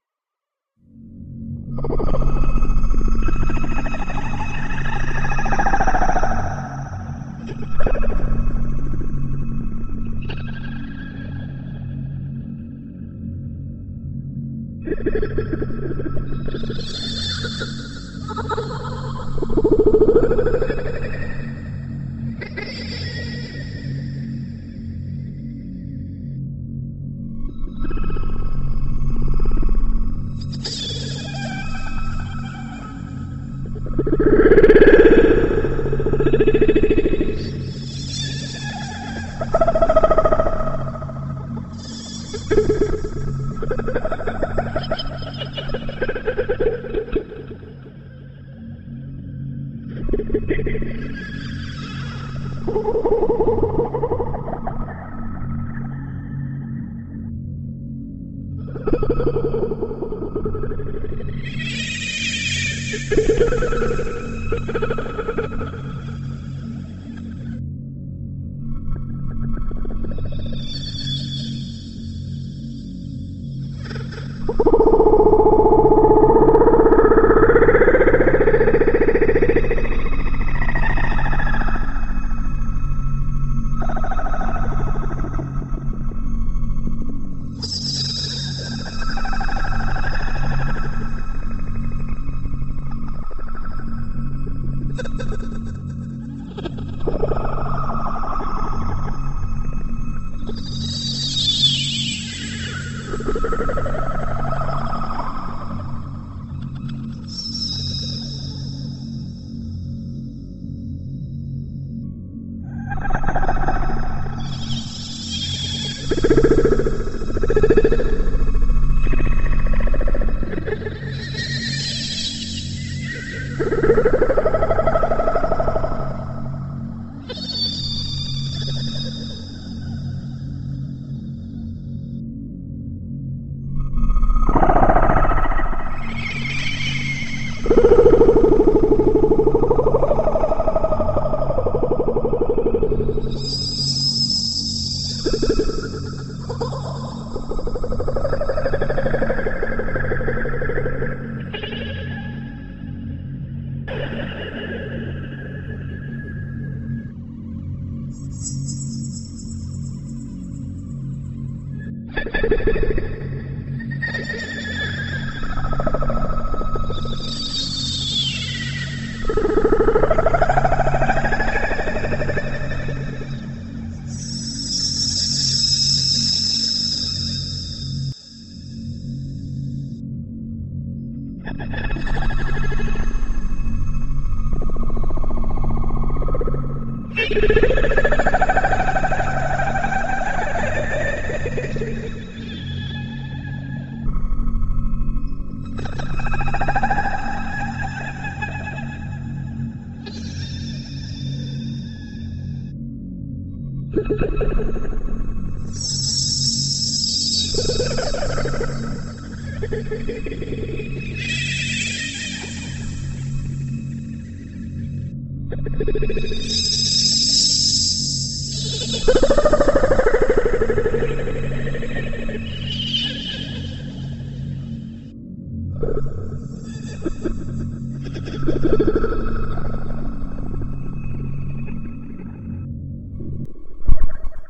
really messed up audio of me talking you cant even tell so it sounds like an alien and i added a droning to give it a good feel